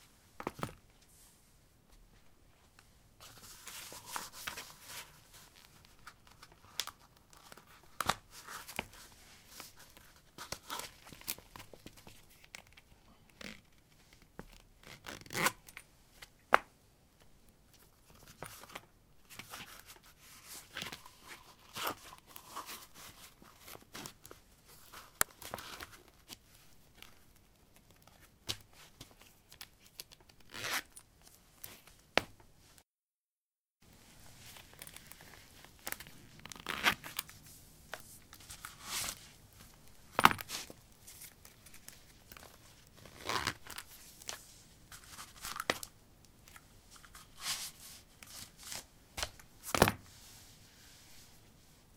paving 07d leathersandals onoff
Putting leather sandals on/off on pavement. Recorded with a ZOOM H2 in a basement of a house: a wooden container filled with earth onto which three larger paving slabs were placed. Normalized with Audacity.
footstep, footsteps, step, steps